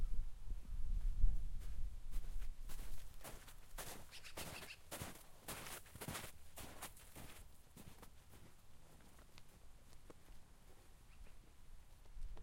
Stereo SnowWalker
Feet walking in the snow, panned in stereo
walk, walking, snow